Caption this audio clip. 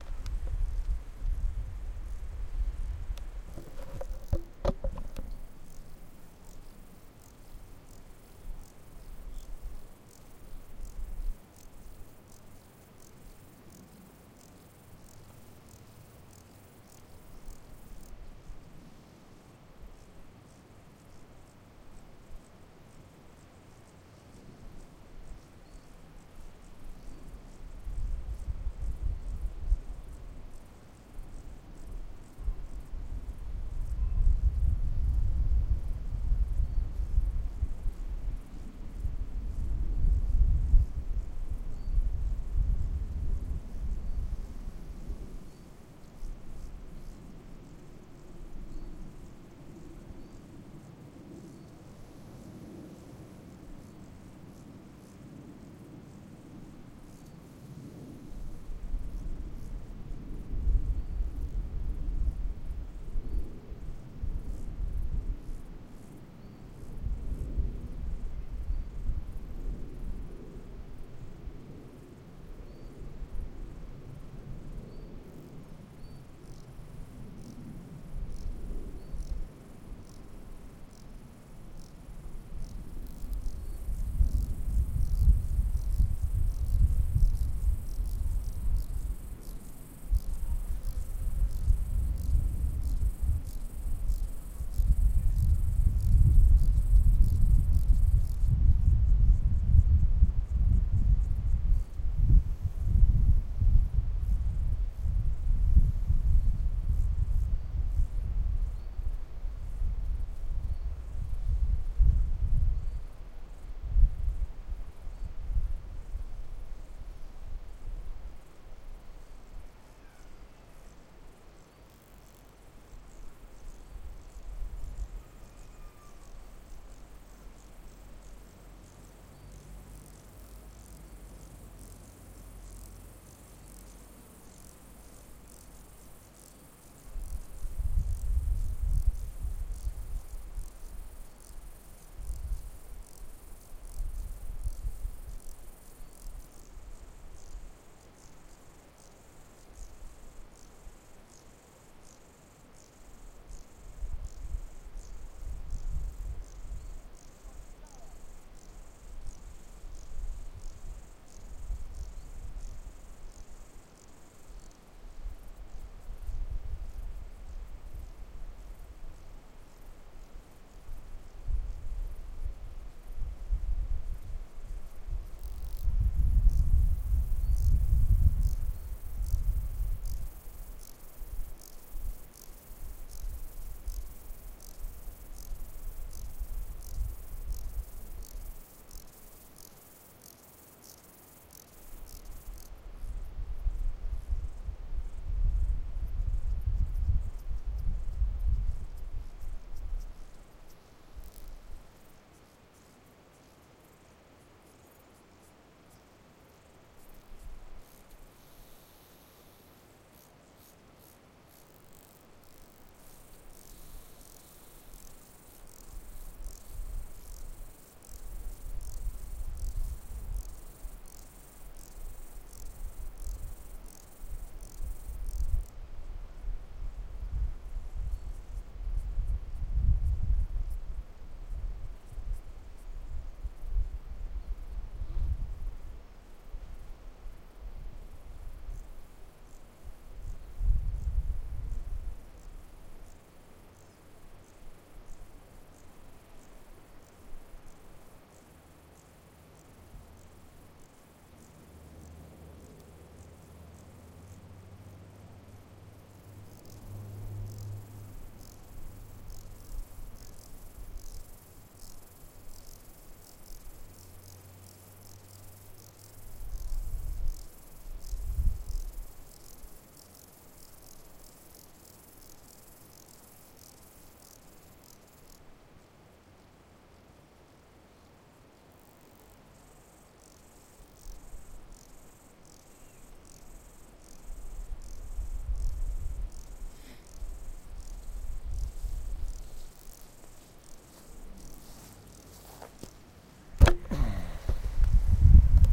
Meadow Alps
Recorded in the French Alps on Zoom H2. Features Grasshoppers and birds.
field-recording, grasshoppers, nature, outdoor, zoom-h2